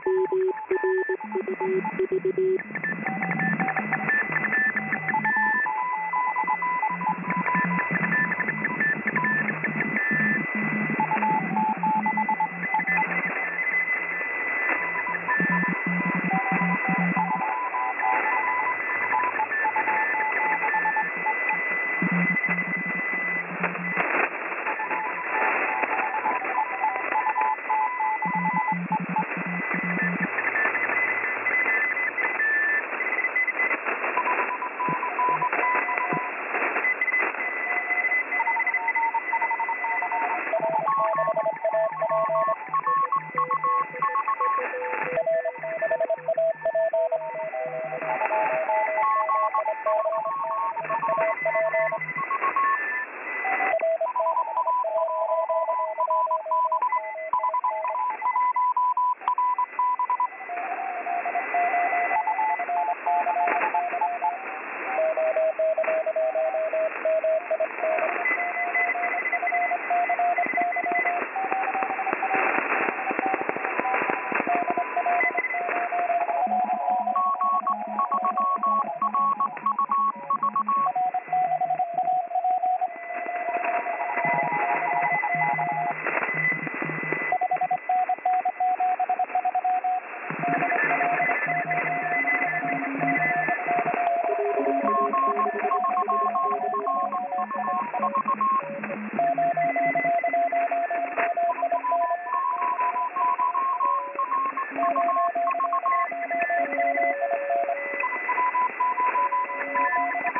Hams on CW multiple frequencies & pile-up 7005.0kHz LSB
CW (Morse) transmissions across a wide band, filter in LSB single sideband mode on the widest setting, multiple stations, one burst of high activity is when several stations are calling a dx station all at once across a range of frequencies, this is called a pile-up. CW is usually listened to at a much narrower setting, but this gives a wide view of this bit of the band.
morse, ham, transmission, dare28, cw, noise, out-of-tune, ham-radio, amateur, beeps, boop, amateur-radio, code, tone, beep, 40-meters, communication, shortwave, morse-code, frequency, short-wave, detuned, pile-up, radio